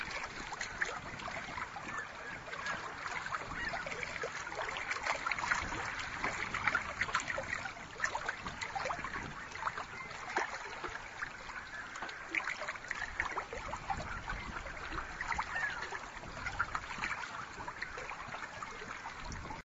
Recorded on Lake Erie shoreline in Port Dover Ontario.
water, port, rocks, condensermic, smallwaves, flickr, lake-erie, field-recording, gurgles, shoreline, iriver799